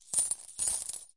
coins - in cloth 02
Coins were dropped from about 20cm into a bowl that was covered with a folded blanket.